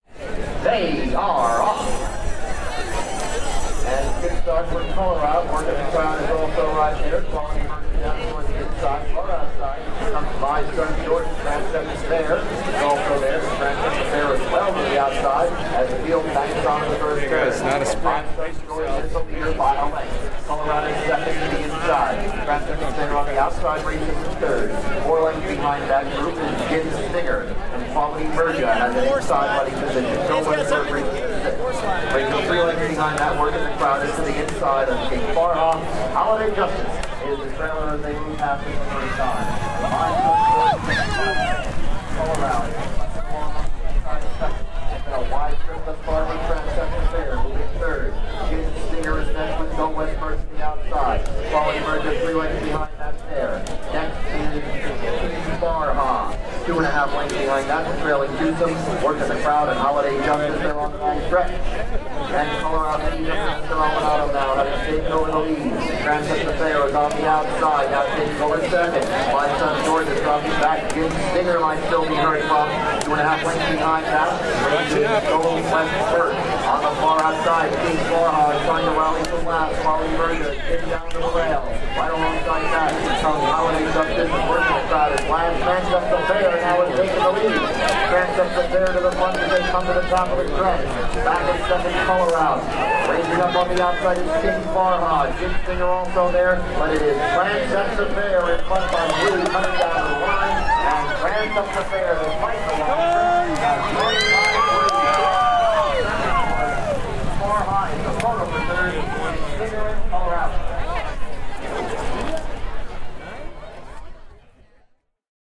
This is the ninth race at the Lincoln Race Track in Lincoln, Nebraska on Friday, June 29, 2012. The winning horse was Transept's Affair ridden by jockey Luis Ranilla. It paid $17.60 to win, $8.60 to place and $5.00 to show.